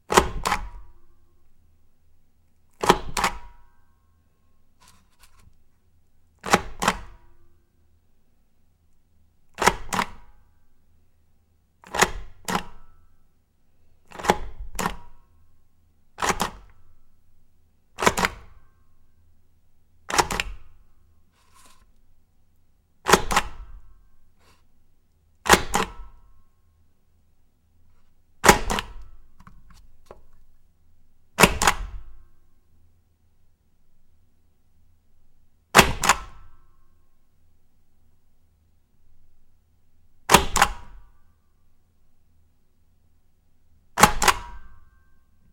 New plastic stamp, various stamping (slow, fast). Recorded mono with a directional microphone. Not processed.